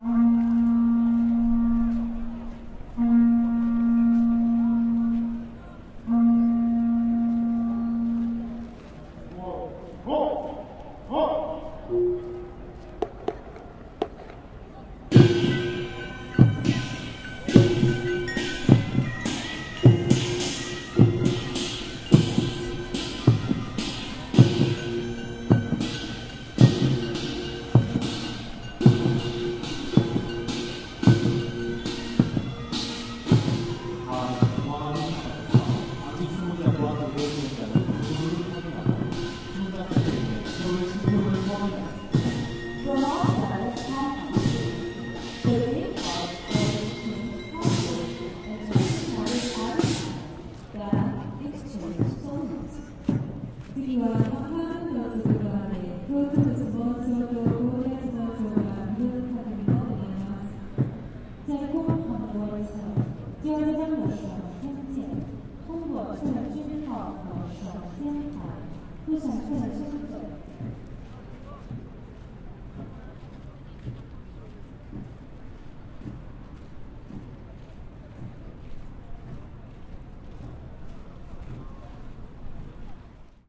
Changing of the guard at Gyeongbokgung Palace.
20120711